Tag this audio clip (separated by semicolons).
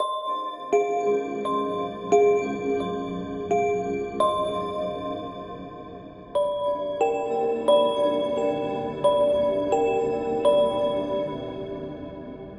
spell; magician; free-game-sfx; game-sound; game-music; adventure; wizard; magic; electronic; witch